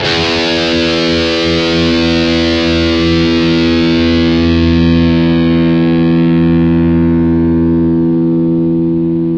05 Dist guitar e
Long e note - Distorted guitar sound from ESP EC-300 and Boss GT-8 effects processor.
distortion, guitar, distorted